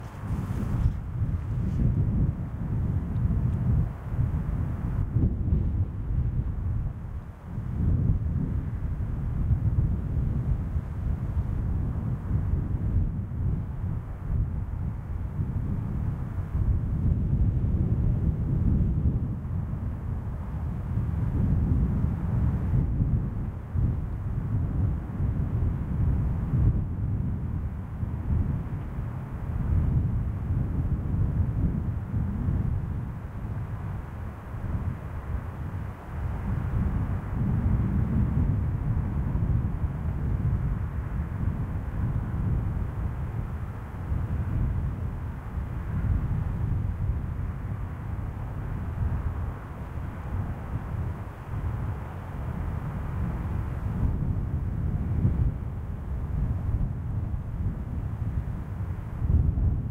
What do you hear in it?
018-Ambient Recording at the top of the hill with a city nearby
city, windy, hill